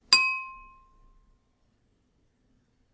Bell ding
Bell sound created by tapping a metal fork against a wine glass. Recorded on Samsung Galaxy S3 using RecForge. Processed in audacity to remove noise and make it sound a little more like a bell.
bell, ding, dong, ring